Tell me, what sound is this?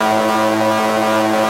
laser active small
Small laser shot loop